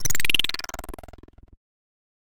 game creature
alien, creature, game, monster, ufo